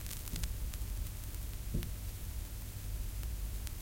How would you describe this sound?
Record Player - Crackle and Thump on Track
Recording of a record player on a vinyl long playing record